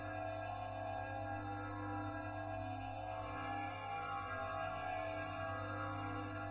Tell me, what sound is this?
Light Cast Loop Aura
healer, holy, chimes, Light, guidingbolt, bell, heal, bowl, shimmer, cast, chime, spell, paladin, magic, aura